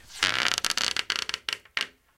Sound of a cable. Recorded with Edirol R-1 & Sennheiser ME66.
cable, rolling